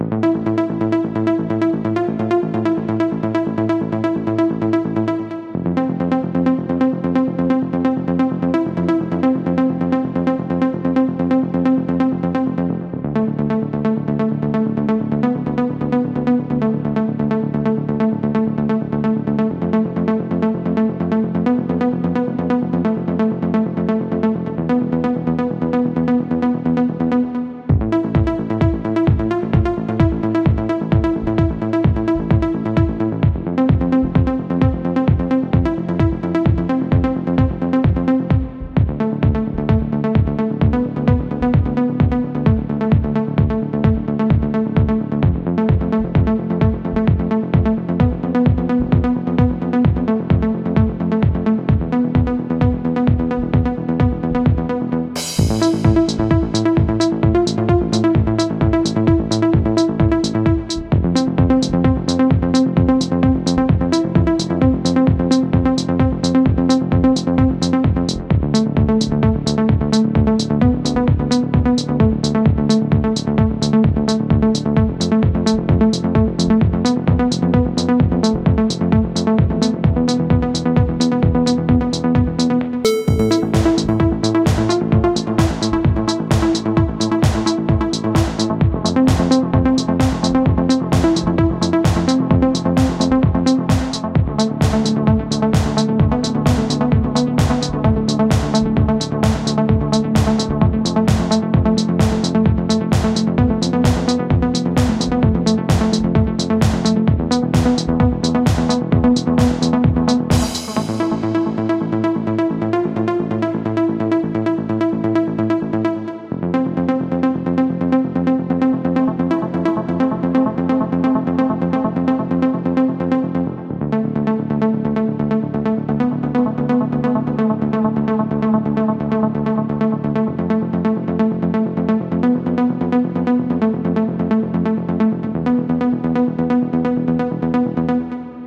Synts Ableton Live, Sylenth1 , Kontakt.